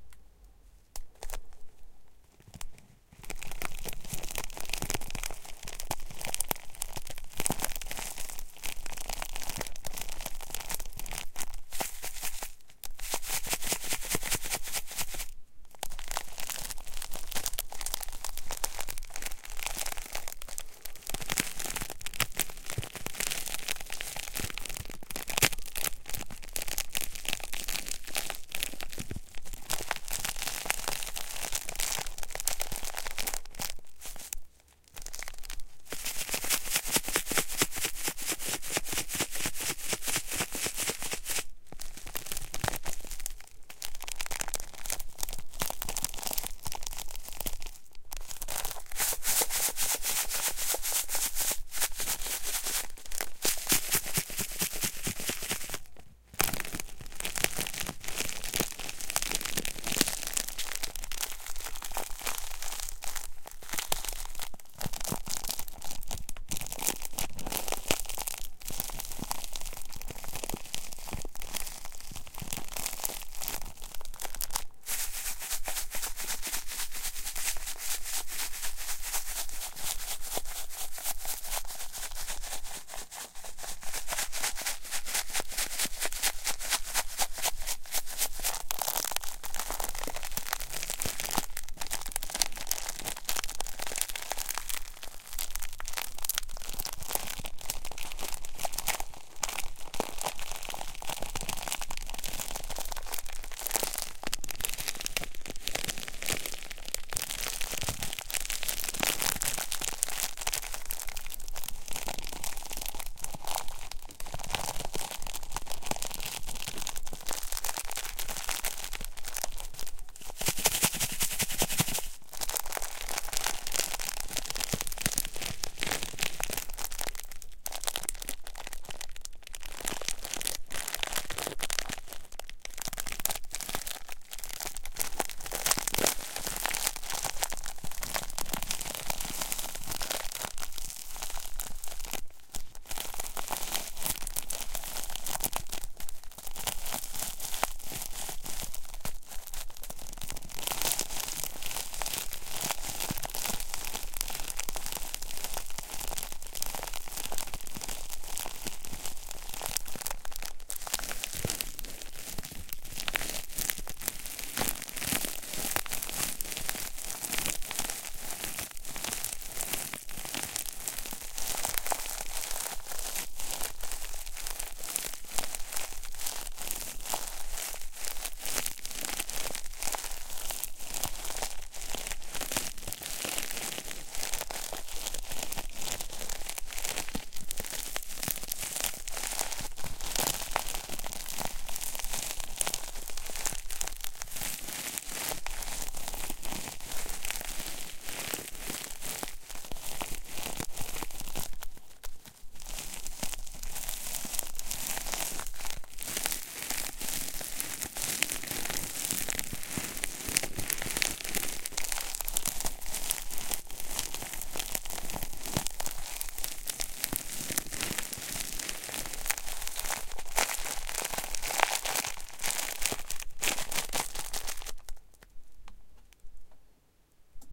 Tea Bag. Recorded with Behringer C4 and Focusrite Scarlett 2i2.
tea, wrap, sound, crackle